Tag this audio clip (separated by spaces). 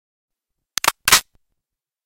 ar-15 carbon chamber fiber one